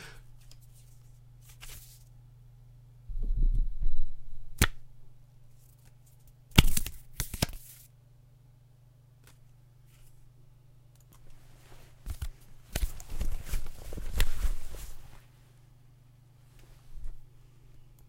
Take a card and put away
Someone taking a card and putting it away.
away, put, take